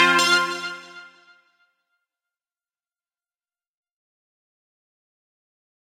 Generic unspecific arftificial sound effect that can be used in games to indicate something was achieved or a message with good news happened
game, achievement, effect